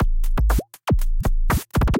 1222-corque-manyvoices
I cut a few short clips from some of my projects to submit to a project that I had seen on the .microsound mailing list. It's pretty fun to do this, I will try to garnish more goodies and share them with the world soon!
This is a short breakbeat loop, synthesized completely using Ableton Operator.